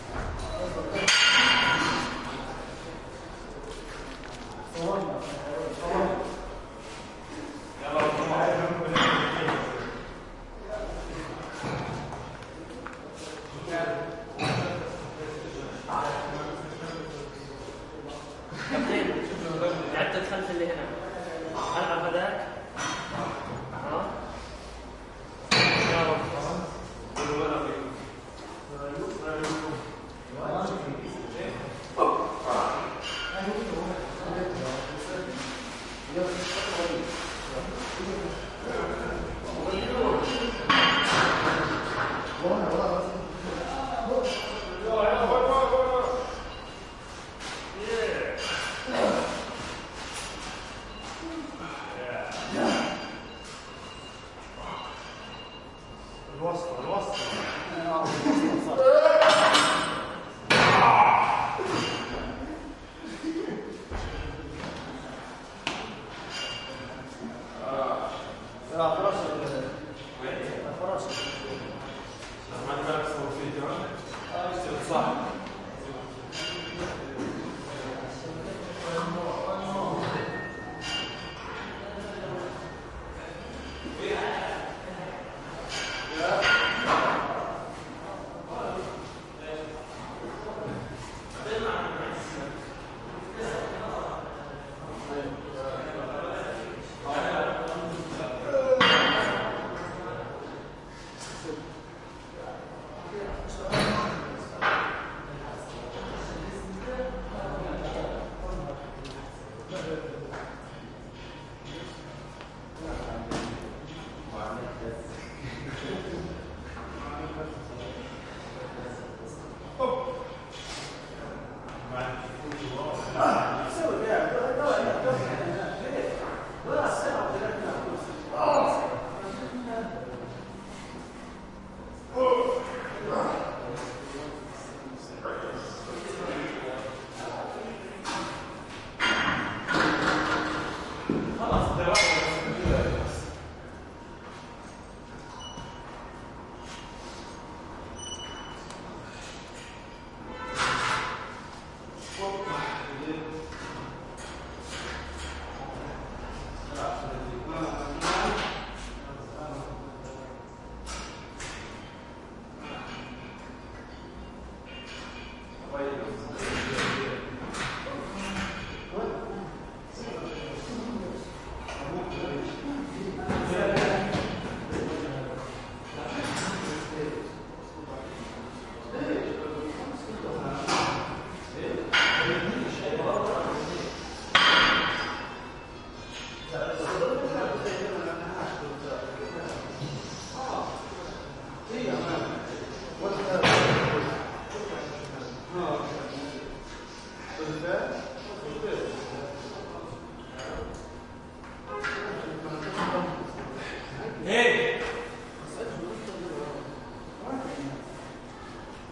Tornado Gym guys working out arabic voices and weights clang roomy3 Gaza 2016
arabic, guys, gym, out, weights, working